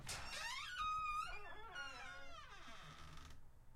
DOOR OPEN 1-2
door, house, opening